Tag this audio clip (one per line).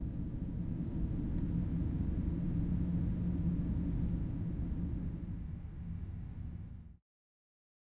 wind
fx